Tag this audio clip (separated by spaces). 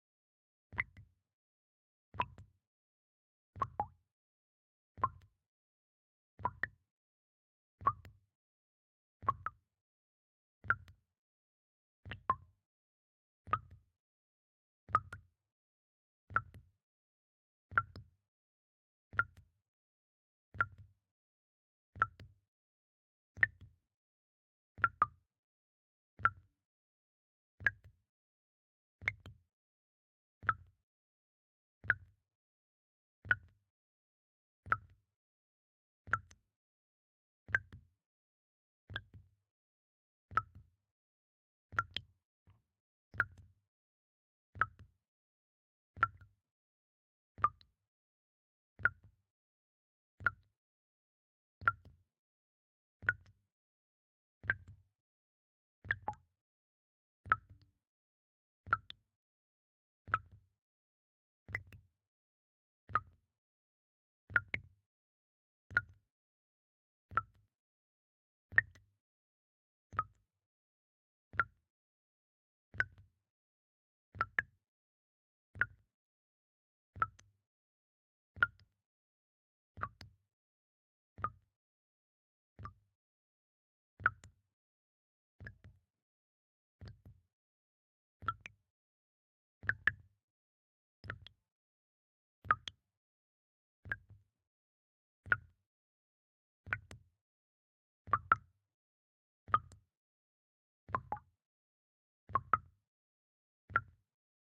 effect tap water foley drops dripping